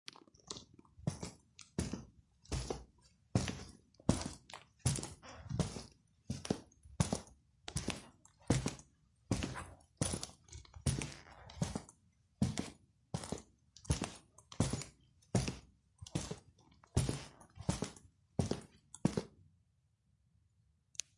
Footsteps - Concrete
Footsteps - walking around in boots, concrete
boots, concrete, floor, foley, footsteps, indoors, shoes, steps, stomp, walk, walking